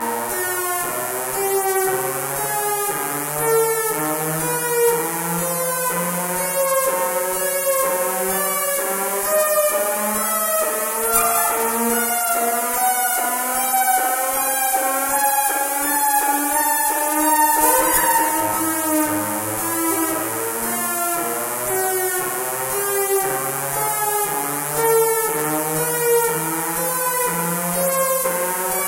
VCS3 Sound 6
Sounds made with the legendary VCS3 synthesizer in the Lindblad Studio at Gothenborg Academy of Music and Drama, 2011.11.06.
The sound has a 1960s science fiction character.
1960s, Analog-Noise, Analog-Synth, Modular-Synth, Sci-Fi, Spring-Reverb, Synthetic, VCS3